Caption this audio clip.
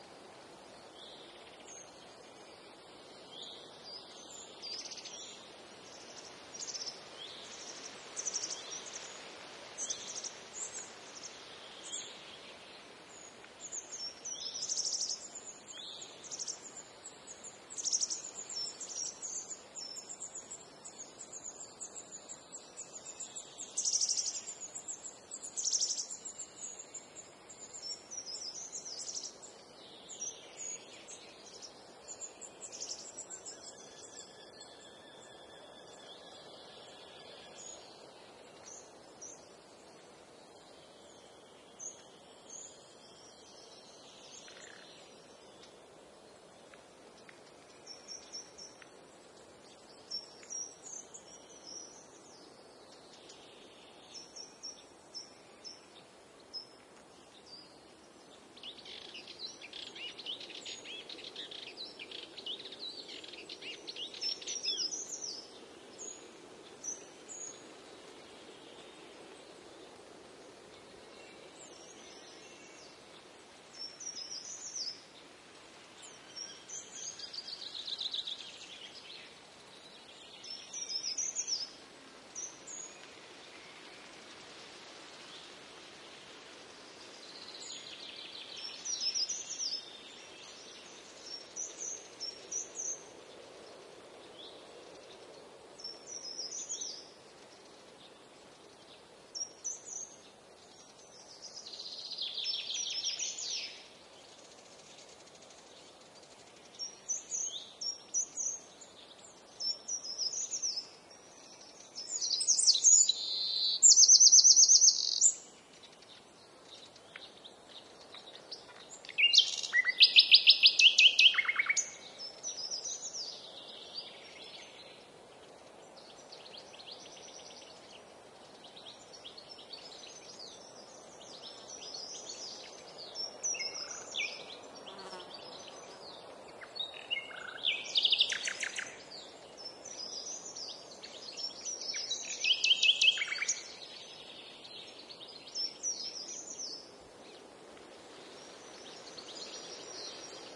20080528.forest.wind.soft.birds
Pine forest ambiance in spring. Birds (Blackbird, Serin, Kite, Nightingale) and breeze on trees (Pine and Poplar). To my ears this is a harmonious mix of sounds: bird calls are varied, not overwhelming, and sound spacious (thanks to the reverberant Kite call). The strong but short Nightingale passage at 2:00 is a welcome surprise. Even wind noise is varied as Pines sound completely different from Poplars. Not strong enough to make me uneasy, on the contrary, it sounds more like two muttering sweet voices. Relaxing? Maybe. What I get from this sample is 'the world might not be such an awful place after all'
Sennheiser MKH30+MKH60 into Shure FP24 and Edirol R09 recorder. Recorded near Hinojos, S Spain, around 11AM
poplar, south-spain, nature, forest, ambiance, spring, field-recording, birds